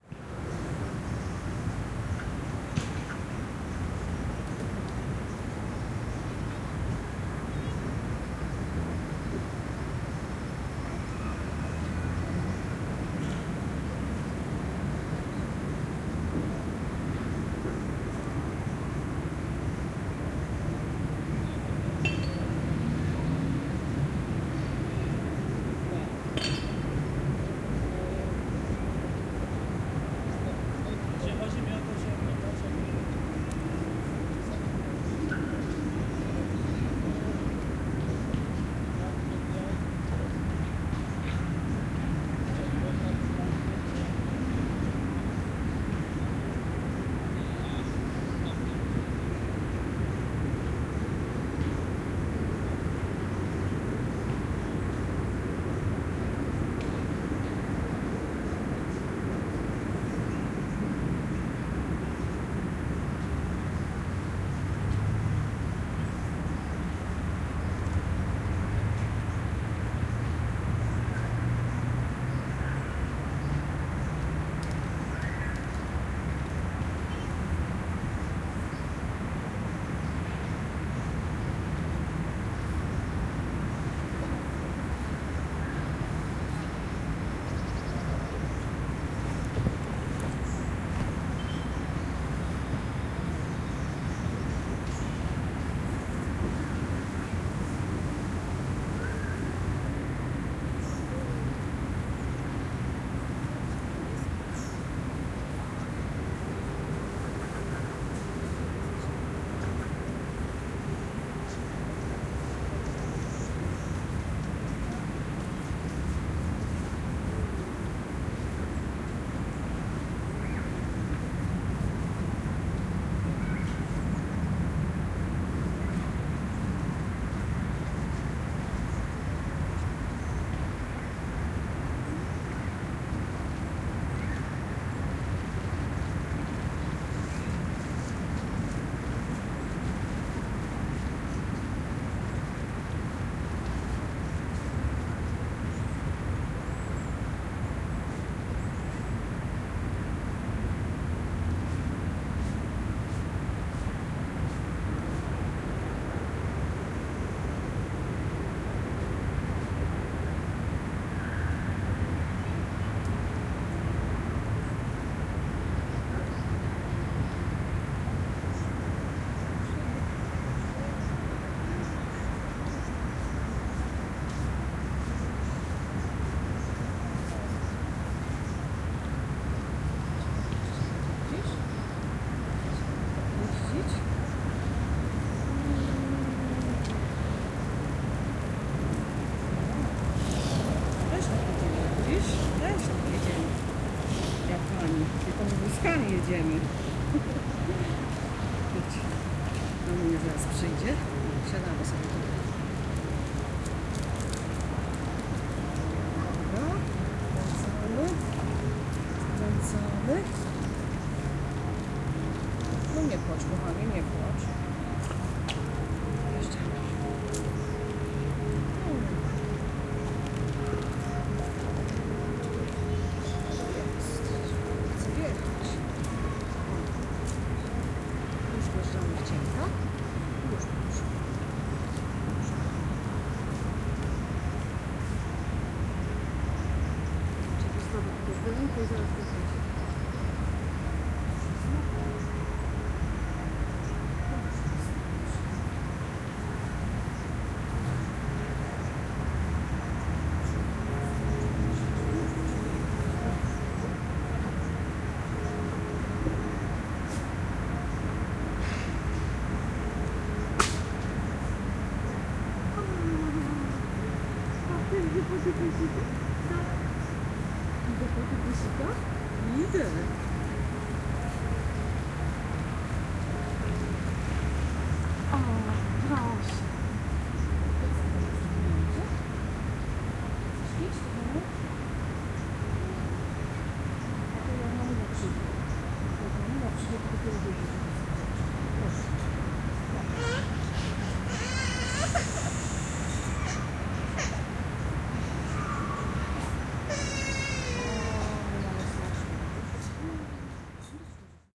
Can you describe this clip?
John Paul 2nd city park
Field recording of city park in Poznan, Poland, during Saturday's morning. Mainly distant cars' pass-bys can be heard, also music played from small radio, impact noises (two men practicing box) and a girl running. In the end, a small child with a grandma is approaching. Because the place was silent, background noise is quite high.
cars, people, city, park, Poznan, silence, noise, background, Poland